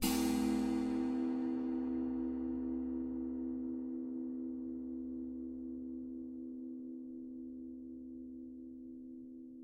China cymbal scraped.